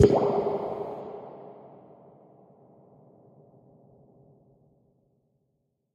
Recording of a drip processed with spring reverb